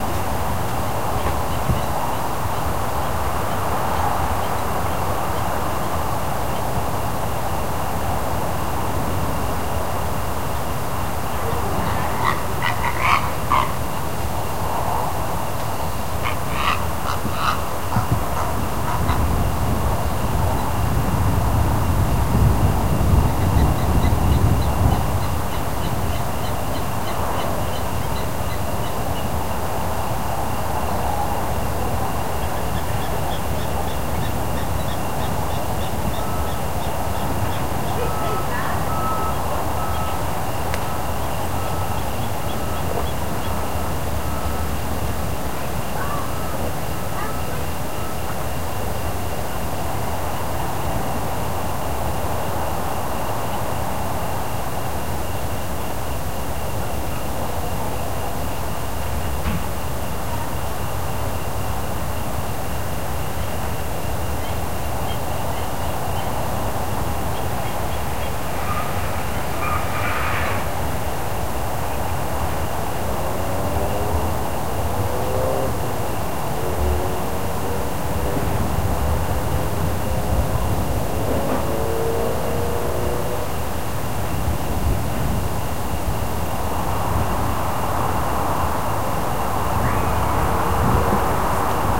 An evening on a field
Evening sounds of a farm.
countryside, eveningsounds, summer, field, nature